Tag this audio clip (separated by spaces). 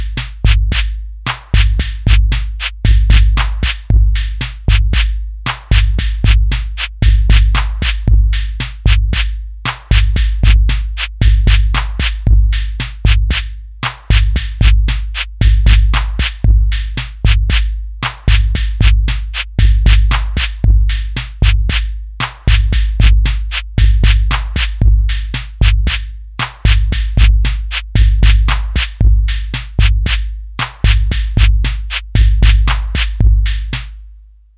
quantized drums drum-loop percussive percussion-loop groovy